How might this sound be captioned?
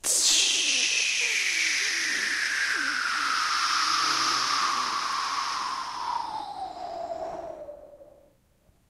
Woosh3 Dn 5b 135bpm
Downwards woosh
5 bars @ 135bpm
beatbox, creative, dare-19, loop